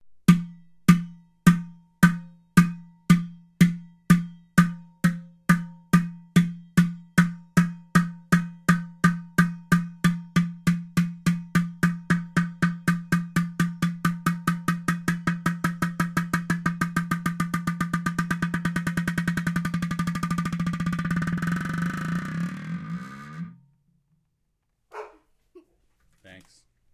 clear plastic globe dropping
Clear plastic globe dropped and bouncing on stone tile floor